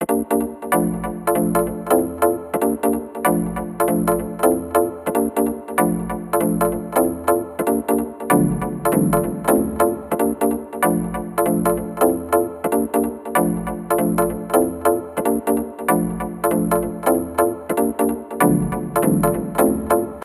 An Unbreakable Glass Car!
House, Jazzy